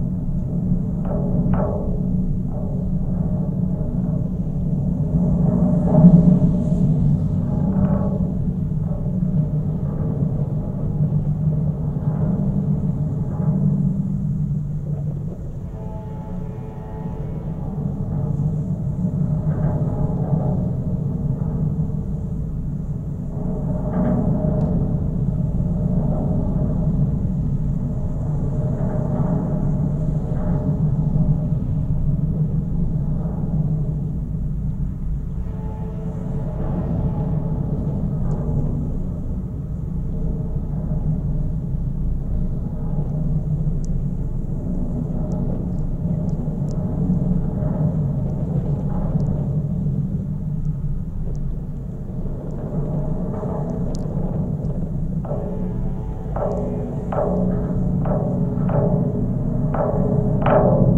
Contact mic recording of the Golden Gate Bridge in San Francisco, CA, USA at the southeast approach, suspender #6. Recorded October 18, 2009 using a Sony PCM-D50 recorder with Schertler DYN-E-SET wired mic.